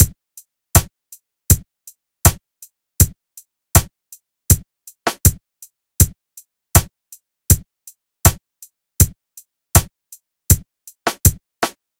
SMG Loop Drum Kit 1 Mixed 80 BPM 0095
drumloop, kick-hat-snare